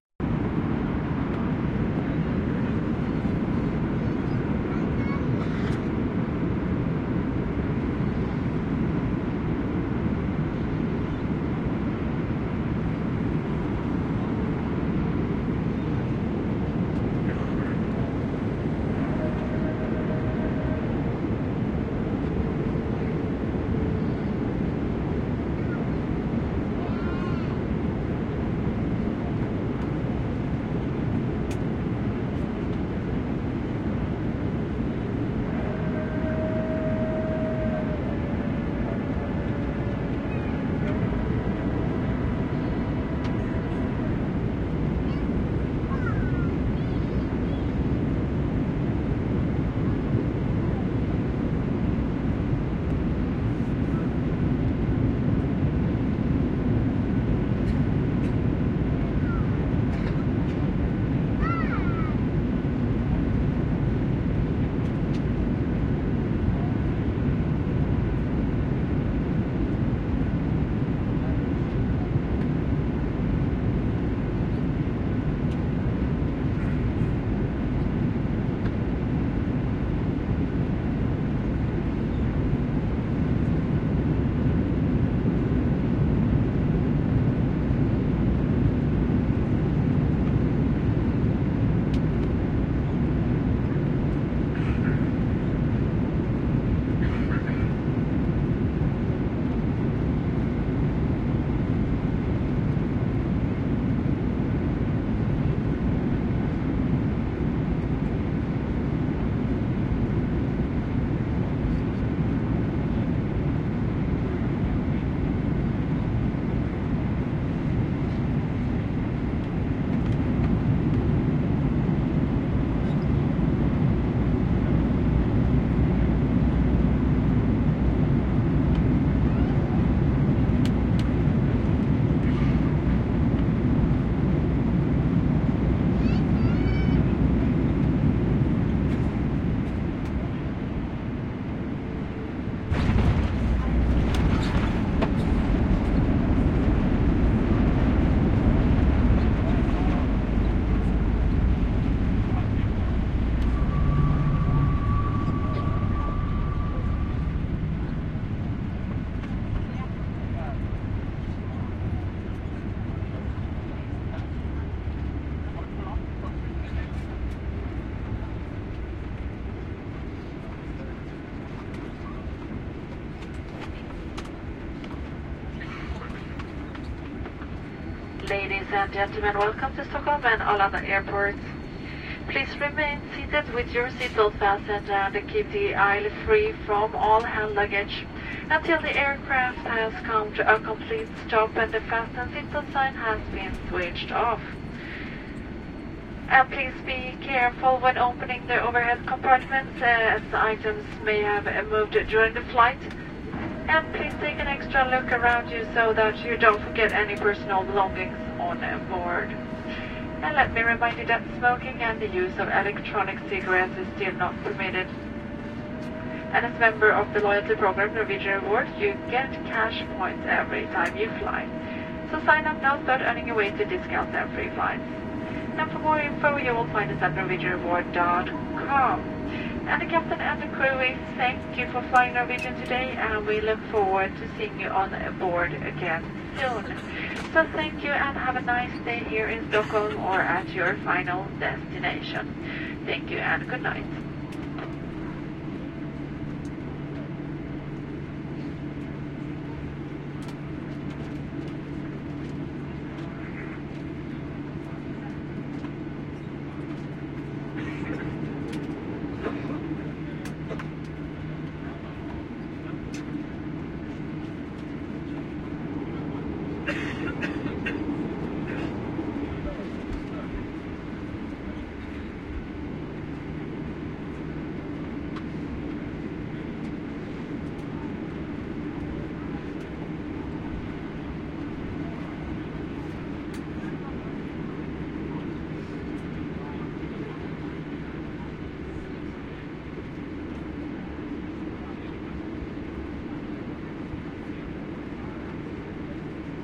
Norwegian aircraft landing at Arlanda Airport.